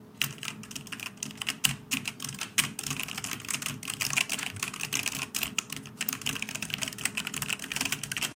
Typing fast on a mechanical keyboard